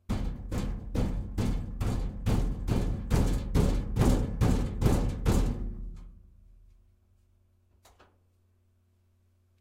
Rhythmic beating on the outside of a washing machine which is a great way to sound like a mechanical device is malfunctioning. Lots of samples in this set with different rhythms, intensities, and speeds. This sounds like a washer rocking in place.
Recorded on a Yeti Blue microphone against a Frigidaire Affinity front-loading washing machine.